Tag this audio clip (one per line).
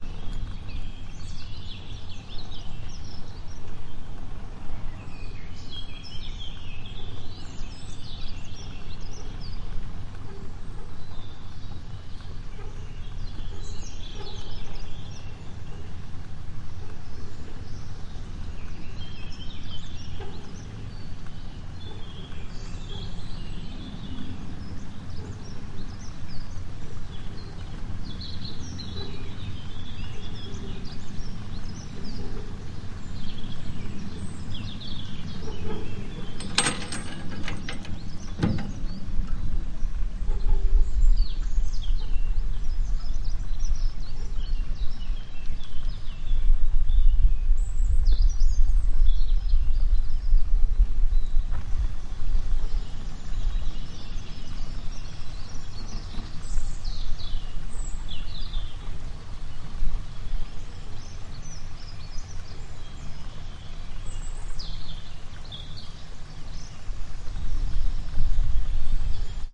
PCM-D50
birds
cableway
Ski-lift
water
Ukraine
ambient
summer
ropeway
Karpaty
Carpathians
ambience
field-recording
mountain
stream
nature
forest
ambiance
sony